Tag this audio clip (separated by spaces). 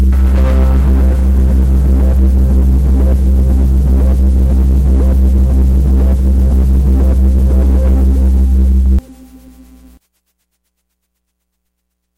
digital analog loop minibrute tension arturia piano key yamaha electronic